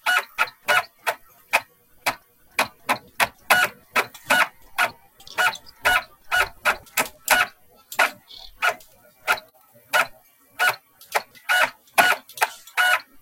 CD Seeking, faint mouse clicks
cd drive in USB disc drive (repackaged "hp DVDRAM GU90N" laptop drive) seeking around music but cut to just the seek noises, some mouse clicks may be heard but most of them have no clicks
recorded on Blue Yeti in omnidirectional mode and denoised in RX 8
cd, cdr, cd-rom, computer, disc, dvd, seek, whirr